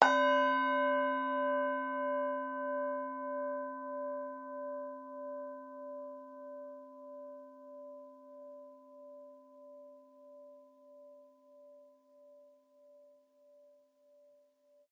Singing Bowl Male Frequency
Tibetan singing bowl struck with soft mallet to sound male frequency.
Low cut for ya n all.
Rode NTK mic as per usual.
Namaste!
bell, bowl, buddhist, fx, meditate, meditation, monk, ohm, overtone, sine, sing, singing, tibetan, undertone, yoga